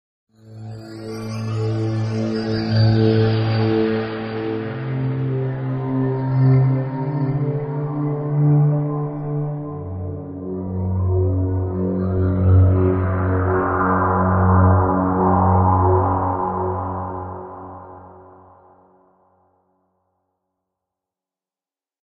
Lost in the dark 01
game, video-game, space, cavern, dark, generated